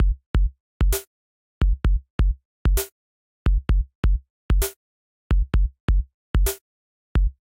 Drum loopHop 130bpm
ABleton Live Synthesis
drum, dub, loop